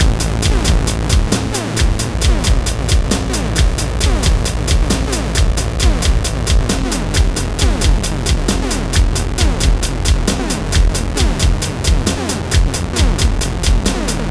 Video Game Warrior 134 Am
Video Game music loop at 134 BPM.
BPM, war, Game, Video, music, 134, warrior